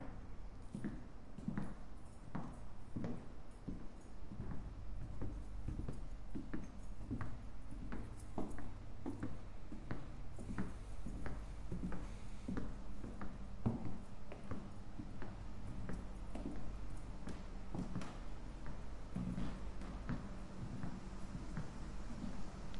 footsteps echo hall
steps, echo, footsteps, walk, reverb, feet, footstep, step, stepping, floor, foot, hard, walking, wood, hall